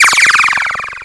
Cosmic Communication 05
advanced, communication, cosmic, dialogue, encoded, extraterrestrial, futuristic, intergalactic, interstellar, mysterious, otherworldly, sci-fi, signals, space, universal